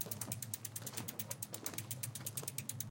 Walking Bike Loop
This clip while short is very loopable. There is ground crunch, light footsteps, clothing sway sounds, rubber tire on road, and the click of the bicycle chain.
bicycle, click, clicking, clicks, footsteps, loop, loop-able, road, sound, sound-effect, walk, walking